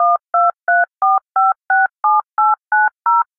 DTMF Dual Tone Multi Frequency - 02 - 1234567890
DTMF Dual Tone Multi Frequency
Part of a collection of Recorded ambient sounds, further processed with the following equipment:
Interfaces:
focusrite scarlett 2i2
Alesis firewire io14
Microphones:
Rode NT1000
SE 2200 A
AKG C1000s
Radioshack PZM Pressure Zone Microphone X2
Optimus 33-3022 Boundary Microphone X2
Optimus 33-3017 Condenser Microphone
Realistic Electret Condenser Stereo Microphone 33-919A
Custom Made PZM - Panasonic condensors with custom 48volt phantom units X2
Clock Audio C 009E-RF boundary Microphone
Sony Stereo Electret Condenser Microphone ECM-99 A
Oktaver IIMK-55
Oktava mke-2
AKG D95s
Beyer Dynamic M58
Various Vintage Microphones
Portable Units:
Olympus VN-8600PC
ZOOM H2
Misc Equipment:
Phonic MU802 Mixer
Behringer Behringer U-CONTROL UCA222
Korg Toneworks AX100G
Various Guitar Pedals
Software:
Reaper
Audacity
Dial, DTMF